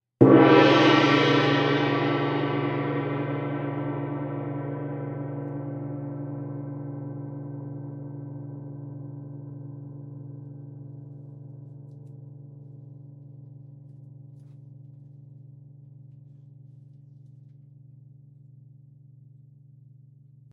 Gong- Loud HIt
Sampled 36" gong hit. Recorded using 2x Shure SM-57's in a studio environment.
gong, oriental, tamtam